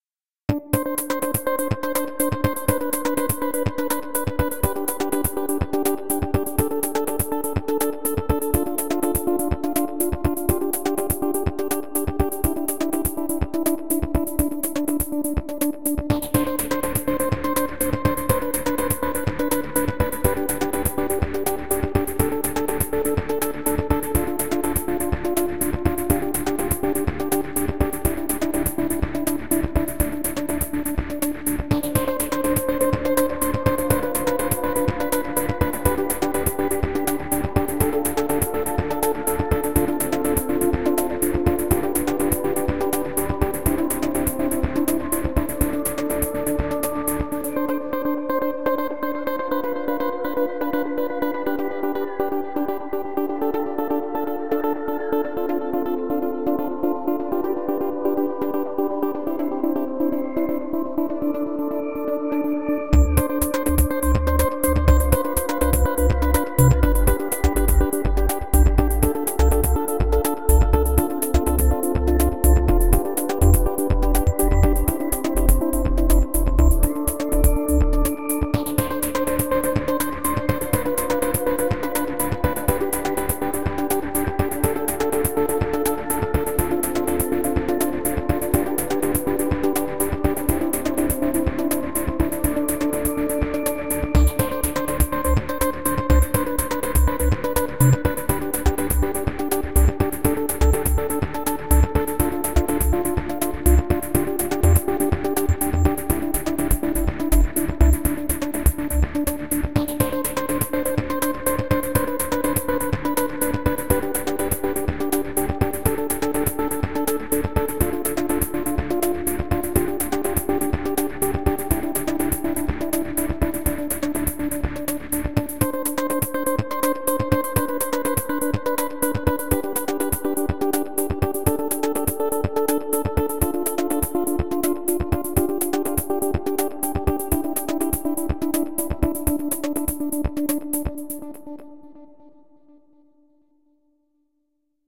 Video Game 7
Electronic music dance track made from individually crafted non sample based synths.
sync, tv, griffin, Video, Danny, Game, producer, loop, electronic, music, free